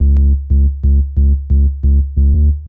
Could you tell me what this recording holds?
90 Subatomik Bassline 01
fresh rumblin basslines-good for lofi hiphop
loop, sound